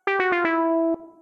negative beeps
A sound that could be used to say 'game over'.
This sound was created in Cubase using the Prologue instrument (I think... it might have been retrologue I can't remember).
bad, beep, beeps, dead, end, end-game, endgame, fail, game, game-over, gameover, gamesounds, incorrect, lost, mistake, negative, no, wrong